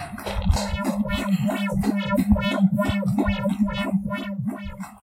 TONNA Julie 2015 2016 SpaceSounds-4
Theme : Not from the planet earth
Sound : Created with Audacity
Contents : one recorded file + one created
Pist 1 : recorded sound
Somebody stepping up the stairs
Volume : +5dB
Speed : x1,979 (to be 5sec)
Effect : Bass and treble (grave : 1dB, aigus : -3dB, volume : -1dB), Noise reduction (noise reduction : 30db, sensitivity : 15, frequency smoothing : 4)
Pist 2 : created sound
Generate > Tone > Carré > 200Hz ; Amplitude : 0,9
Volume : -10dB
Length : 5sec
Effect : Phaser (phases :10, dry/wet : 135, frequence LFO : 3, phase de départ LFO : 30, profondeur : 190, retour : 40%), Wahwah (LFO frequence : 2,3, start phase LFO : 110, profondeur : 63%, résonnance : 3,7, décalage de fréquence Wah : 15%), Fade In, Fade Out
Typologie (Cf. Pierre Schaeffer) :
V’’ (itération variée) + X (itération complexe)
Morphologie (Cf. Pierre Schaeffer) :
1- Masse : Son "cannelé"
2- Timbre harmonique : Brillant
3- Grain : Lisse
4- Allure : Pas de vibrato
5- Dynamique : Attaque violente puis relâchement graduel